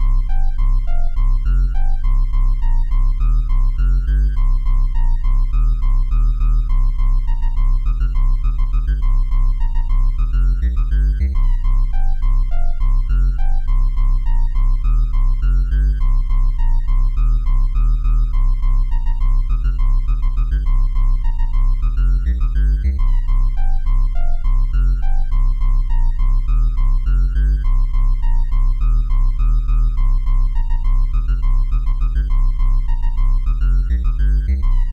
This is version 2 of Chiptune heavy sound melody loop. This version has a lighter and clear sound. It is also looped 3 times and its loopable.
Thank you for the effort.